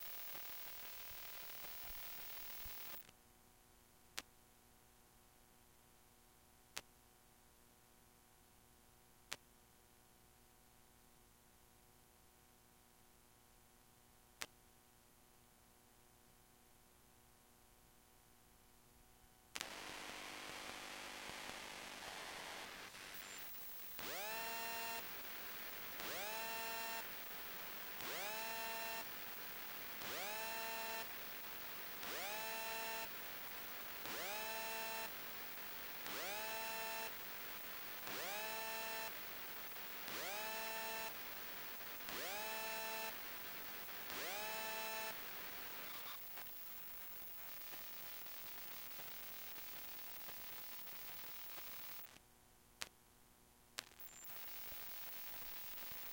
Cell Phone Ringing EMP 2
EMP of a cell phone ringing on vibrate. Indoor. Recorded on Zoom H2
cell, electronic, magnetic, phone, vibrate